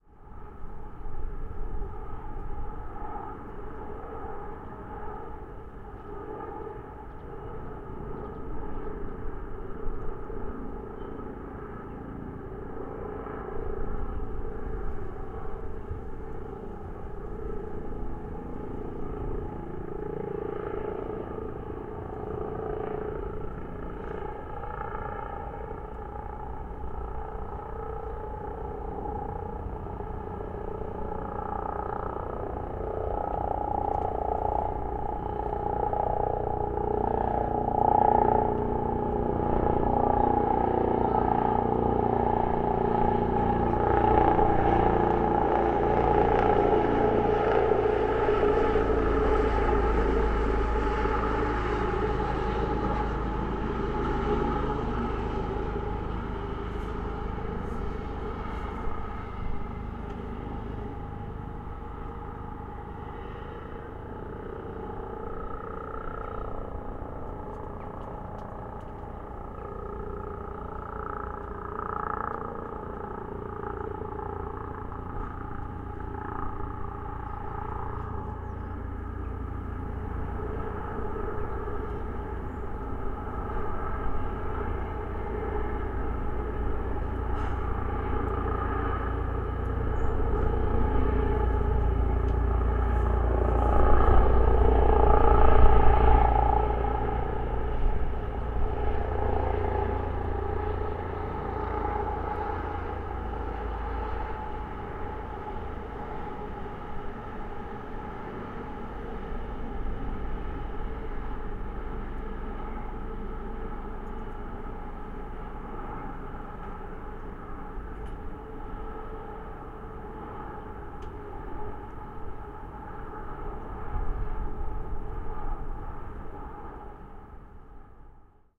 This is a recording made just outside my window. A helicopter flew over just a minute later, twice! I used a cheap large diaphragm condensor mic, with a plastic bendable tube around it, pointing just outside of my window. This created a cool midrangy-resonant-combfilter kind of sound. Gear used: Cheap LDC -> M-audio DMP3 -> Terratec EWX2496. This is for the EarthFireWindWater contest.